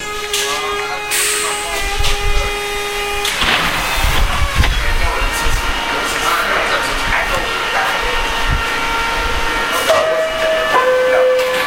Doors to the subway open doors the subway ding shut closed. A high pitched hum runs under everything.